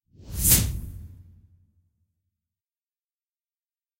Swoosh And Hit 1
Short swoosh / whoosh sound effects with a thump hit for video editing, games, film, presentations, and commercial business use.